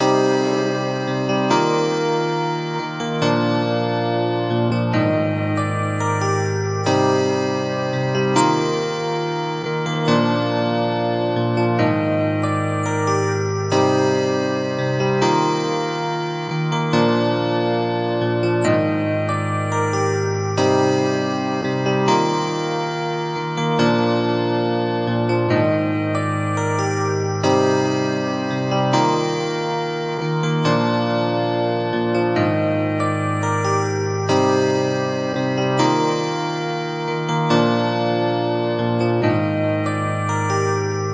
Sythwave Vaporwave Pack - Keys 2
Electronic Keyboard / Piano Loop Created with Korg M3
140 BPM
Key of F Minor
June 2020